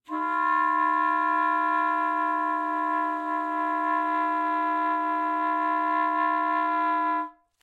One of several multiphonic sounds from the alto sax of Howie Smith.